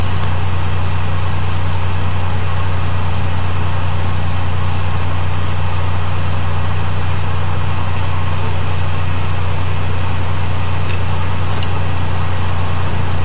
computer fan built-in
Six year old Macbook Pro from
Recorded with Zoom H4N/built-in microphones at 12ß°